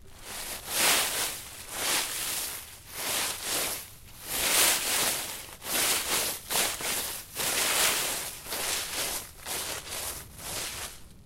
Snow and dryLeaves06
Various footsteps in snow and dryleaves
walk, snow, footstep, winter, step, ice